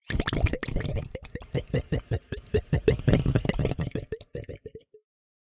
various sounds made using a short hose and a plastic box full of h2o.